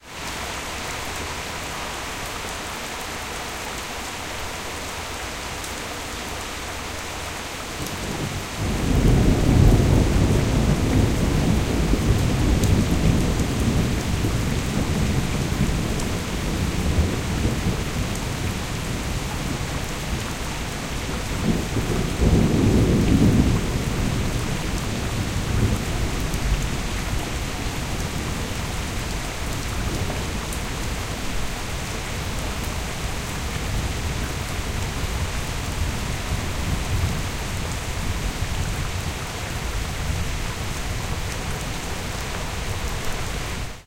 Distant thunder sound with some rain in the background.